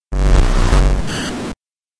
STM1 some bass 3
Over processed deep bass. A little static.
static, bass, distortion